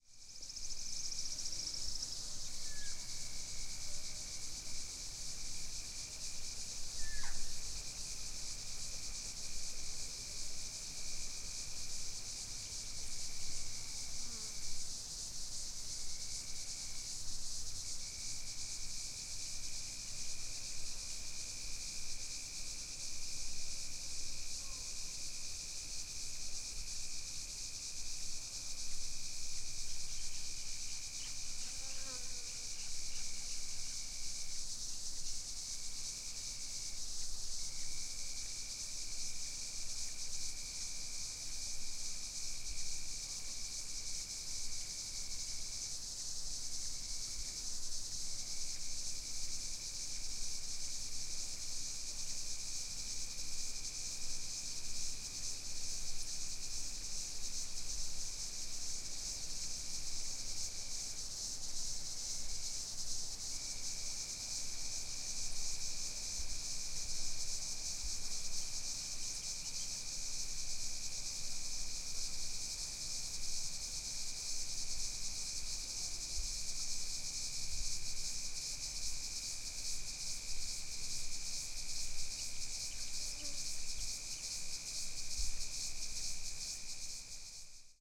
Dusk Atmos with Cicadas
A short atmos recorded around dusk on a summer eve in Fryers Forest Victoria, Australia.